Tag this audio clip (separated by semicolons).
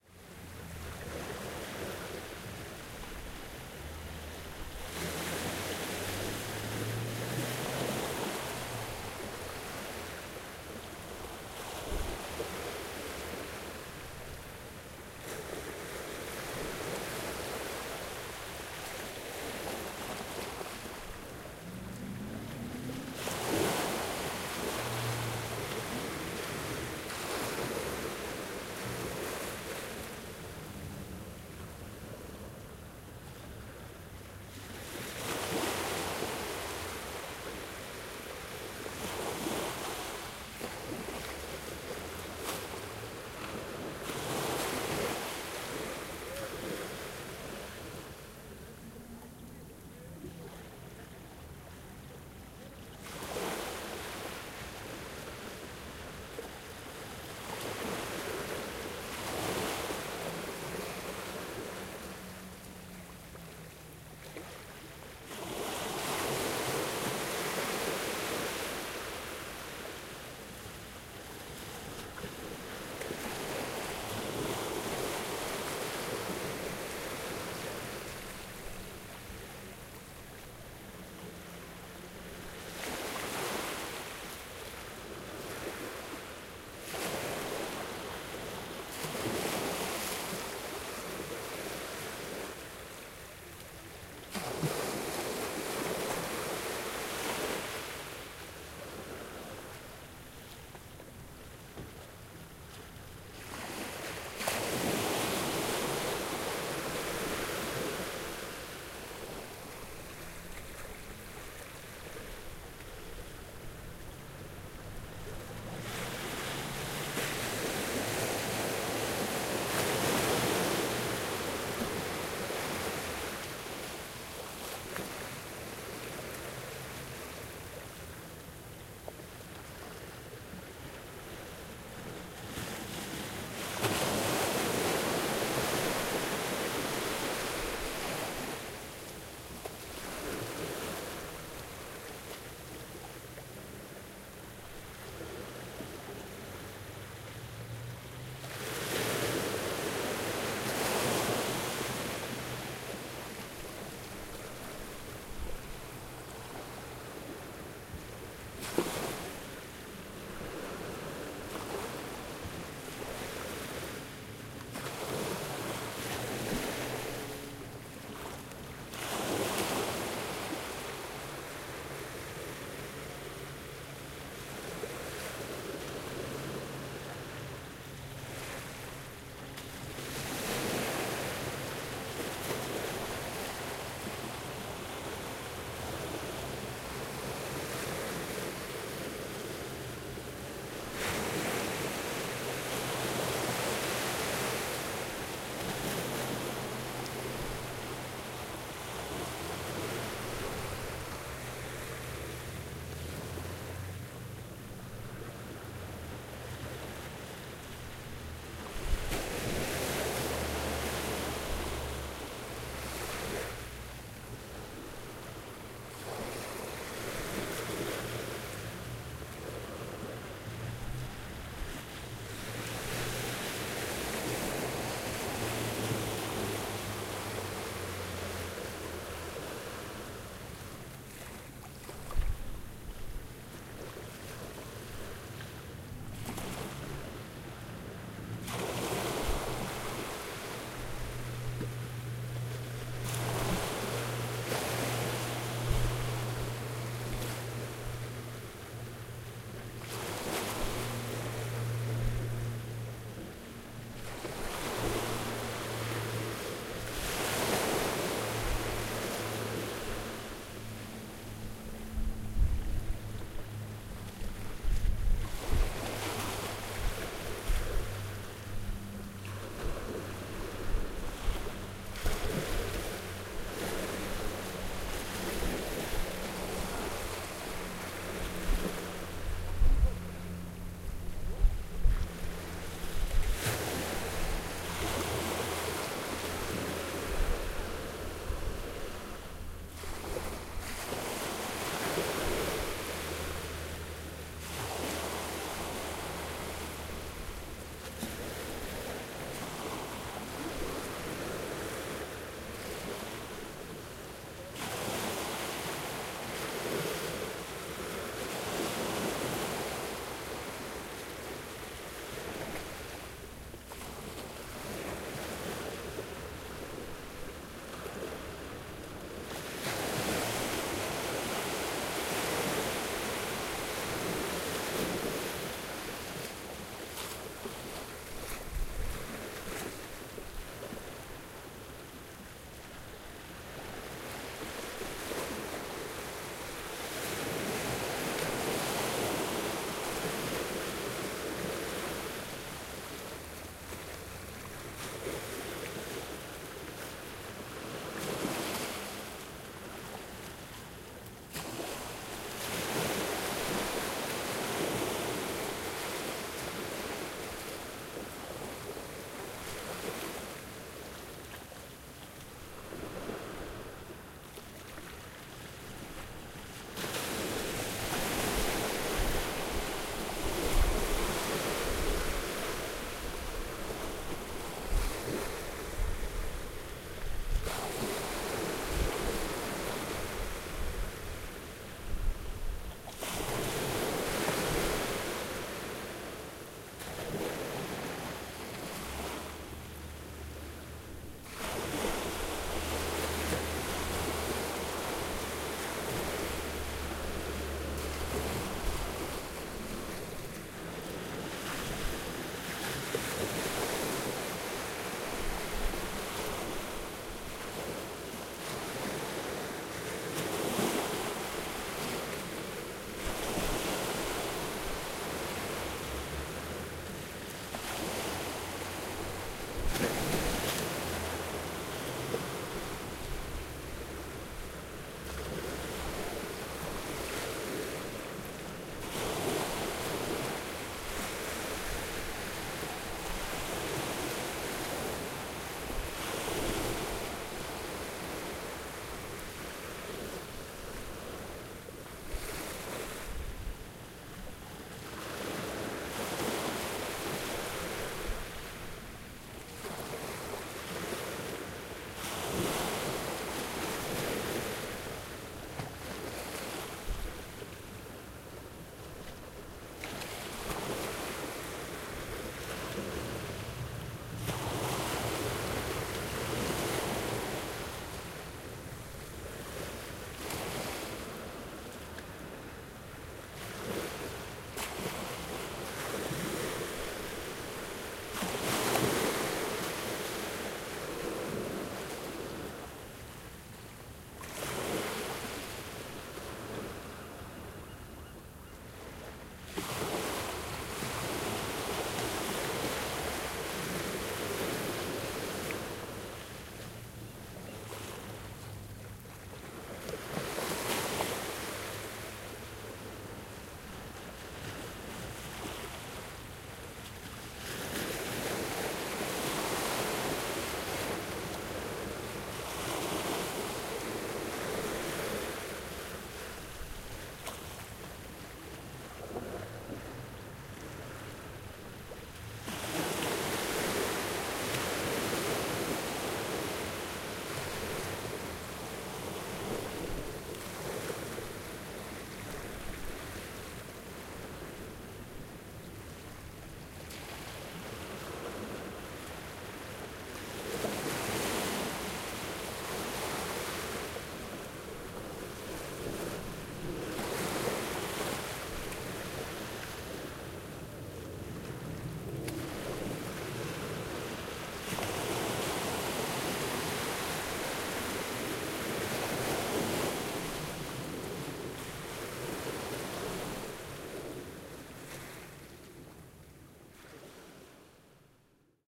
field-recording; san-francisco